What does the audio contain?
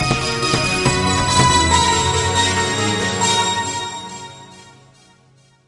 clasic bells10
loop, studio, synth